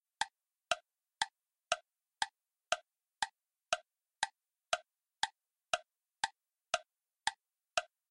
A ticking sound I used in one of my animations.
Created in 3ML Piano Editor.